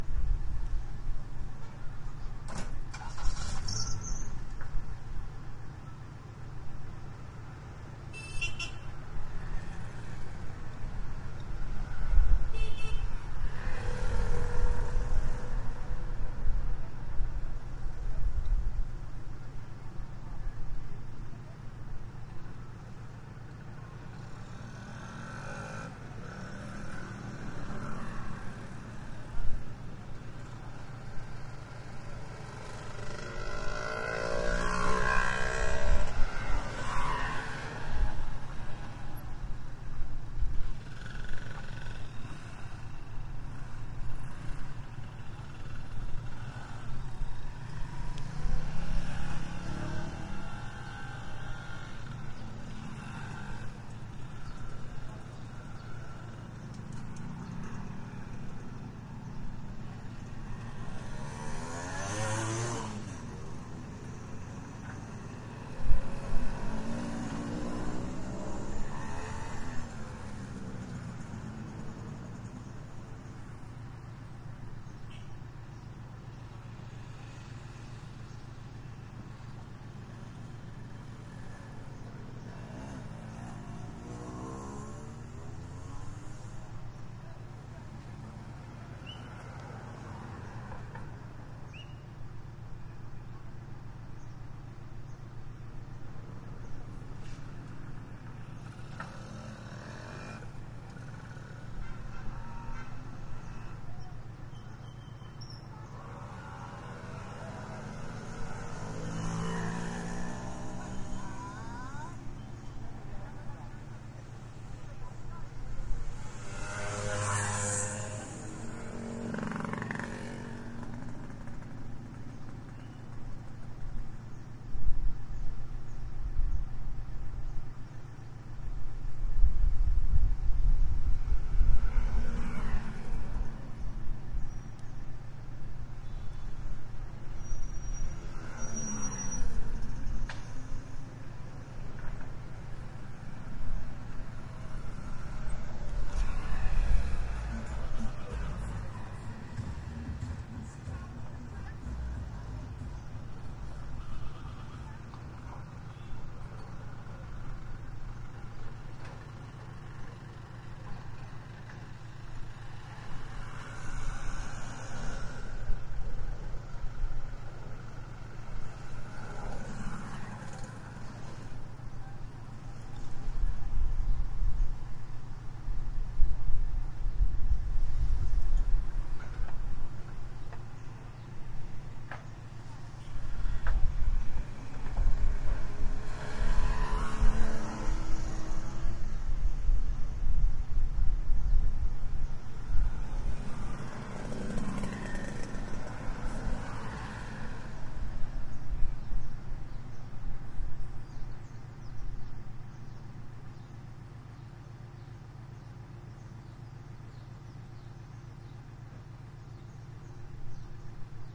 San Pedro de Macoris-May 13
Soundscape recording on the street corner outside the Esperanza offices in San Pedro de Macoris in the Dominican Republic. May 13, 2009.